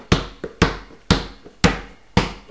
hija balon 2.5Seg 24
ball, bounce, Bouncing